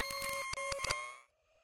Ronald Ray Gun
Per forum request. Clicky, pingy sound. Intended as a less-dramatic but maybe more usable laser gun/shield recharging sound.